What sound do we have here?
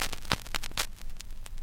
Short clicks, pops, and surface hiss all recorded from the same LP record.
analog, glitch, noise, record